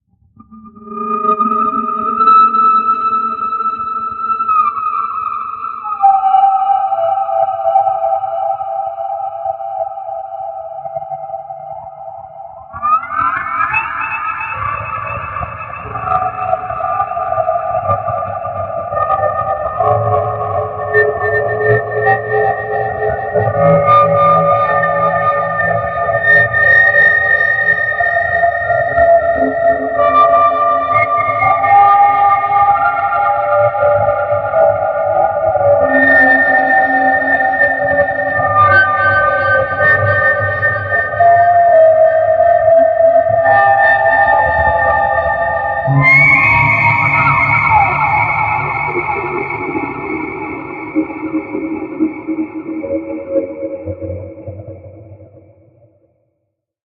Samurai Jugular - 19
A samurai at your jugular! Weird sound effects I made that you can have, too.
dilation, effect, experimental, high-pitched, sci-fi, sfx, sound, spacey, sweetener, time, trippy